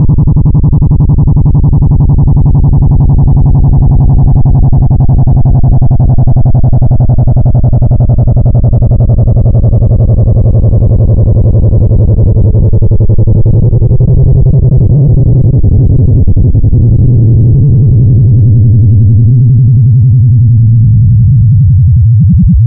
a low periodic chaotic hum
made from 2 sine oscillator frequency modulating each other and some variable controls.
programmed in ChucK programming language.
sine, chuck, sci-fi, programming, chaos